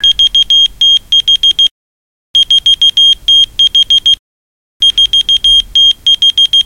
keyfob beeps
This is a recording of one of those keyfobs that you whistle to and to make it beep so you can find it. Came from Target. Recorded on a ipod touch 3G with Blue Mikey Stereo microphone using FiRe app.